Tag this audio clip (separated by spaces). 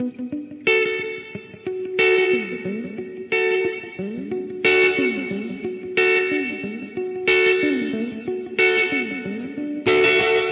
electric-guitar riff processed-guitar guitar